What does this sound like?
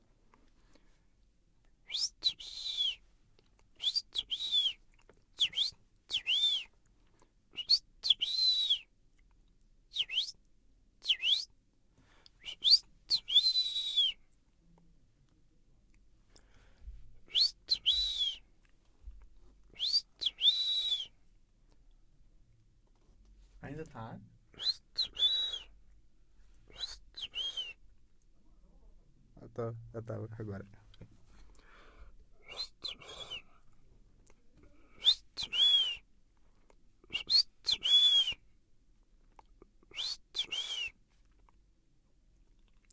The Shure SM58 Dynamic Microphone was used to record a whistle from the Bem-Te-Vi bird made by humans.
Recorded for the discipline of Capture and Audio Edition of the course Radio, TV and Internet, Universidade Anhembi Morumbi. Sao Paulo-SP. Brazil.